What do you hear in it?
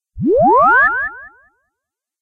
zoom up 2 (slower delay)
A brief rising tone with added delay. This is the second version of this effect, with a slower delay speed. A very "Astroboy"-esque style effect.
zoom, sci-fi, delay, warp, space, science-fiction, echo